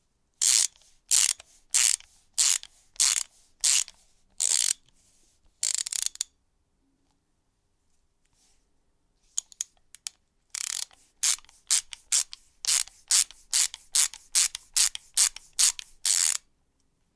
Socket spanner being used
metal, ratchet, socket, spanner, wrench